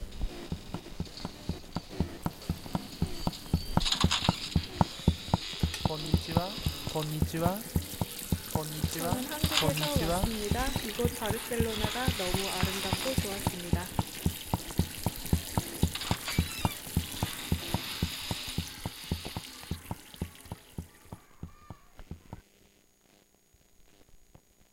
Caçadors de sons - Mira mira Miró
Soundtrack by students from Joan Fuster school for the workshop “Caçadors de sons” at the Joan Miró Foundation in Barcelona.
Composició del alumnes de 1er de l'ESO del Institut Joan Fuster, per el taller ‘Caçadors de sons’ a la Fundació Joan Miró de Barcelona.
Fundacio-Joan-Miro, Cacadors-de-sons, Barcelona, Tallers